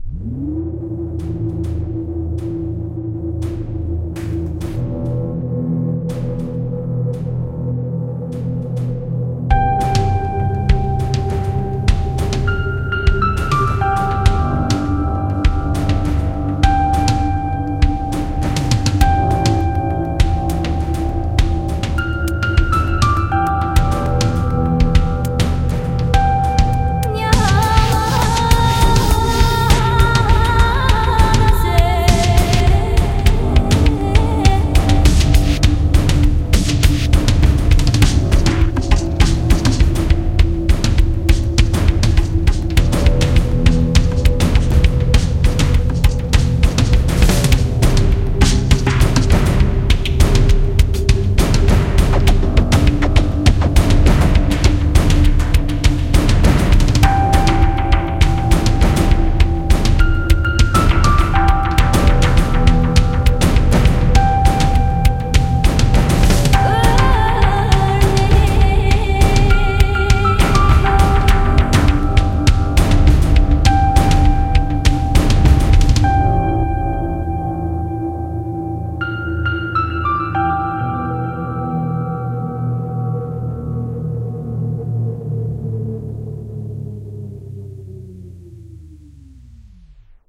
Heroes of the Moon
This is one of my better creations out here!
But i think you a deserve this wonderfull piece
I created this whole song with LogicX and several plugins and sample library
I own all content in this song so im free to give it away for free!
Alien, Aliens, ambient, Cello, cinematic, Electronic, Game-Creation, Hyperdrive, Instrument, movie, Noise, Orchestra, Outer, Outer-Space, Phaser, Sci-Fi, SciFi, song, Space, Spaceship, Spook, Violin, Warp